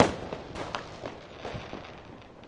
delphis FIREWORKS LOOP 15 ST
Fireworks recording at Delphi's home. Outside the house in the backgarden. Recording with the Studio Projects Microphone S4 into Steinberg Cubase 4.1 (stereo XY) using the vst3 plugins Gate, Compressor and Limiter. Loop made with Steinberg WaveLab 6.1 no special plugins where used.
ambient, fireworks, shot, c4, fire, s4, explosion, thunder